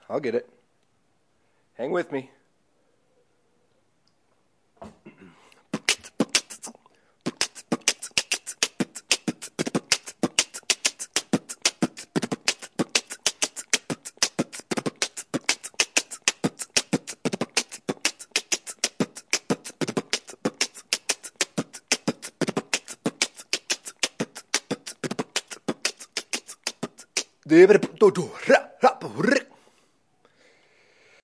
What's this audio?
One of my favorite beatboxing drum beats. Fast paced with some nice rolls - all done with my vocals, no processing.